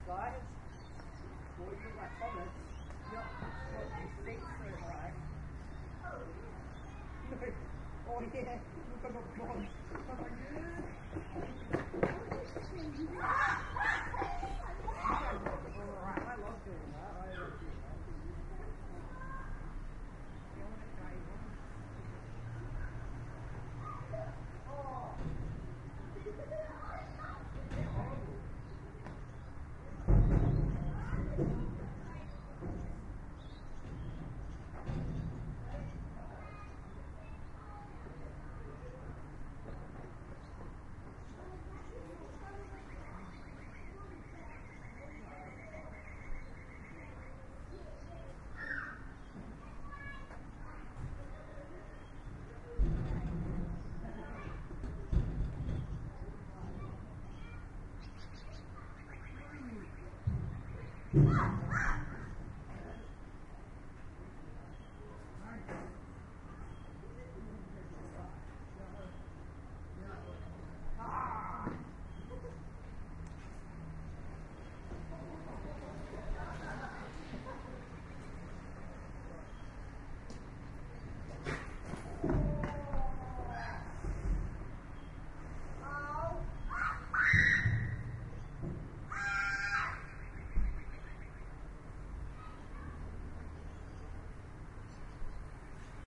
R09HR internalmics KidsPlayingInStreet
This is one of two recordings I made comparing the internal mics of an Edirol R09HR and R09. This is the R09HR. The sound is of children playing in the street. Recording levels were matched between the recorders [high sensitivity, low-cut off, display dim, plugin-power off]. The R09HR has less hiss and better sensitivity.